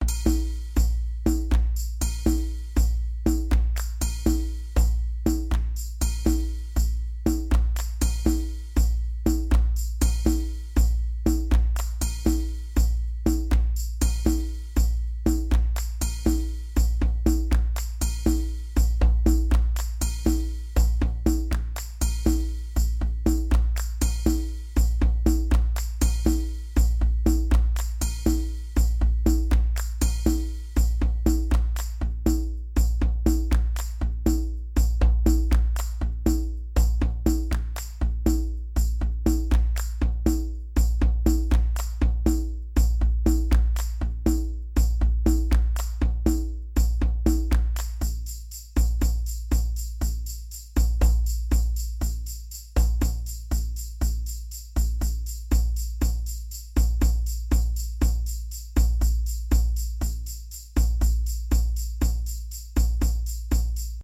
i created this loop for a intern assignment.
The drumloop is a very easy and static drumloop that easy to follow!
Perfect use for background loop in voiceover parts or other voiceover scenes
rhythm, cleaner, loop, percs, drums, beat, drumloop